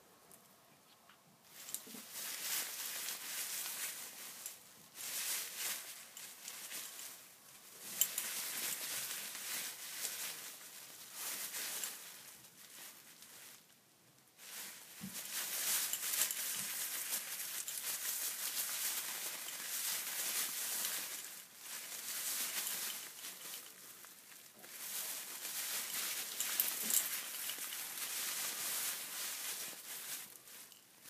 moveTrack clothes
a sound that can be used for a move track, clothes rustling as people move.